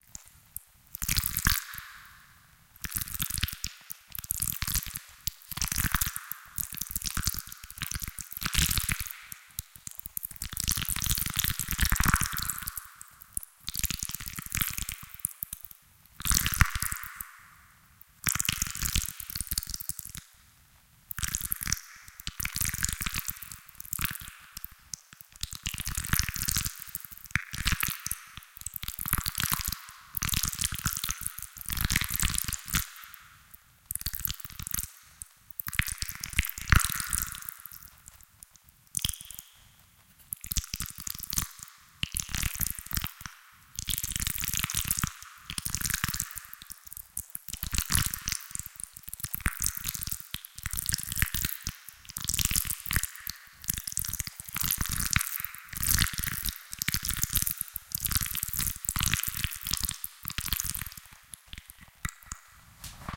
Schmatzschmatz proc
The sound of noodles (with sauce) touched by a spoon. Recorded with two RHODE NT 5 directly into a Presonus Firepod. Processed with SubBass and Reverb/Hall.
disgusting, larva, noise, noodles, processed, schmatz